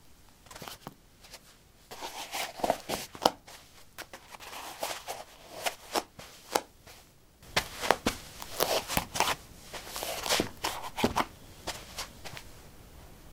Getting high heels on/off. Recorded with a ZOOM H2 in a basement of a house, normalized with Audacity.